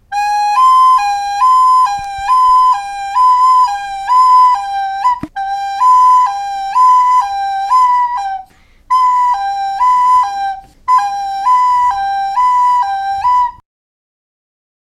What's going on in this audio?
Sirène police
Police car siren made with flute.